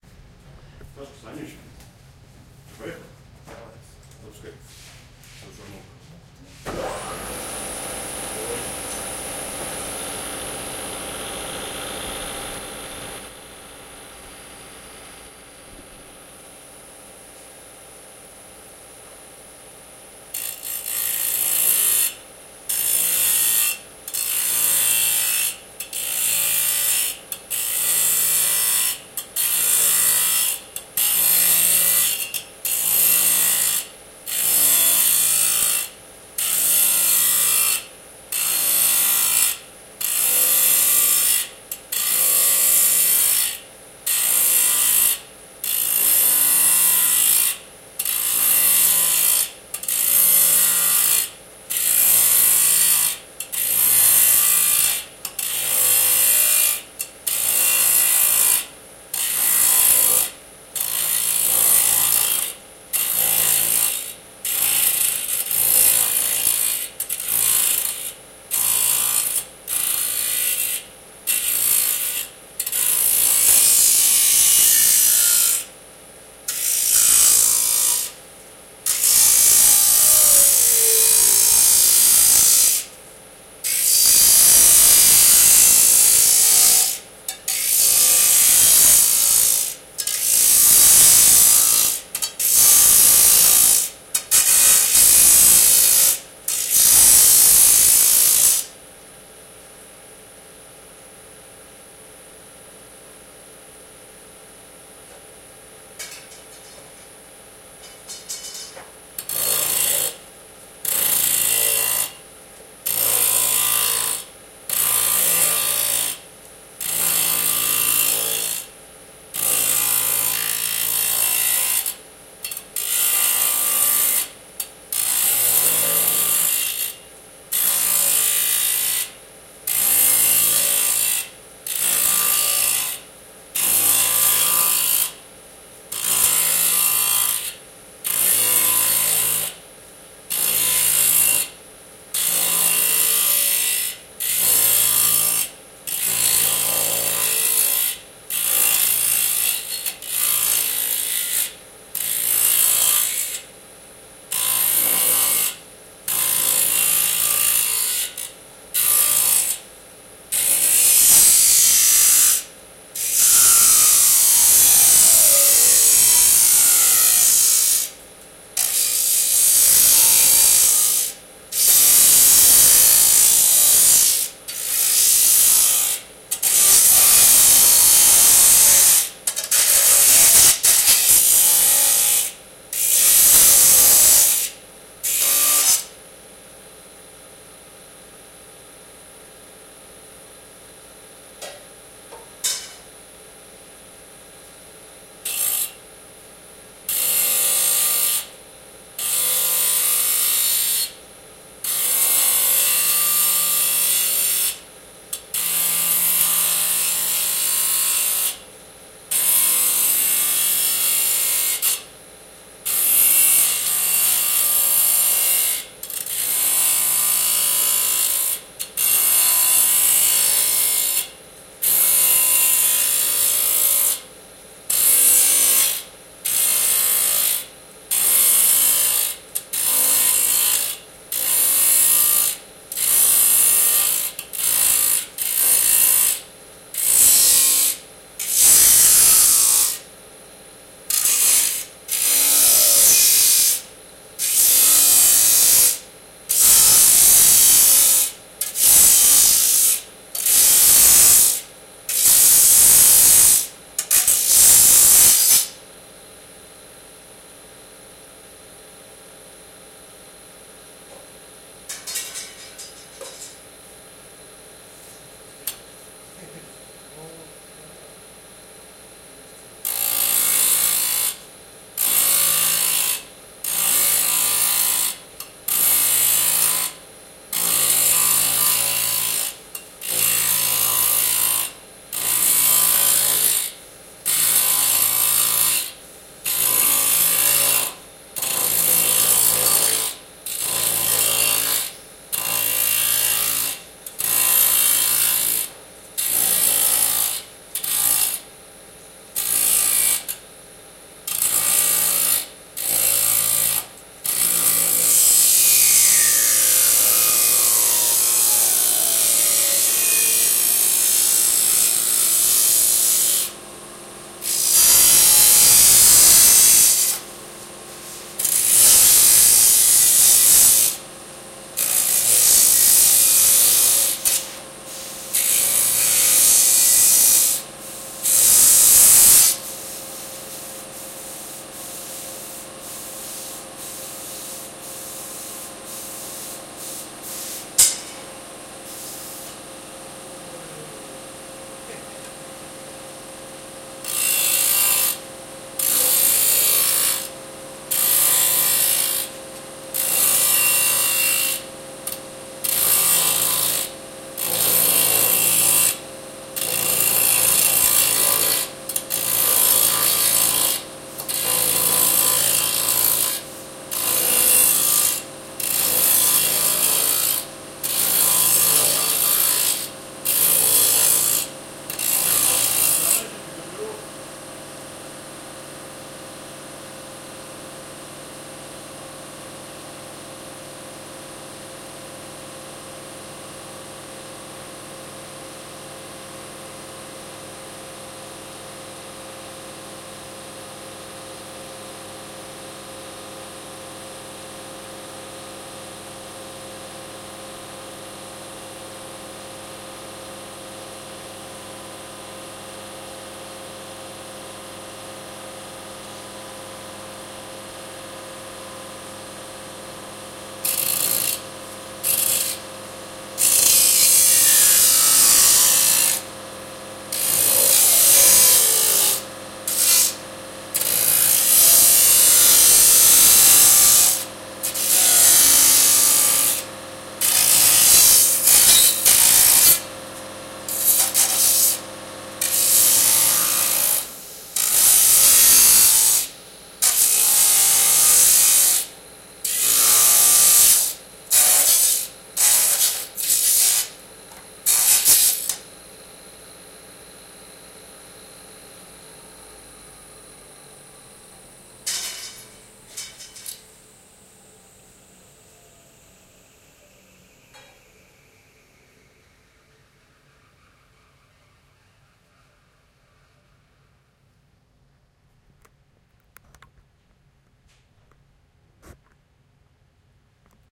Disk Saw Cuts Alluminium in Workshop
Sounds of alluminium parts cut by rotating disc saw in workshop
Please check up my commercial portfolio.
Your visits and listens will cheer me up!
Thank you.